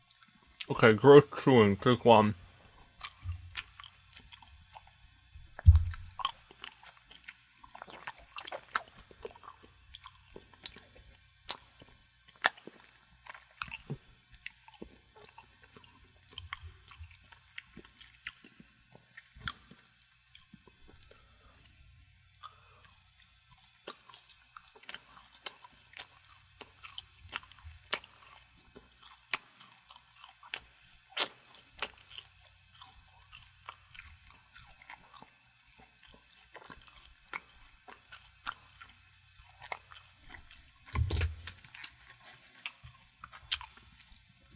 disgusting gross chewing with mouth open, on a Shure KSM27